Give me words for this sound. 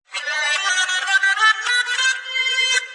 Rover-Exotica
A brief finger passage recorded on a Washburn Rover travel guitar, digitally filtered and played backwards.
guitar, backward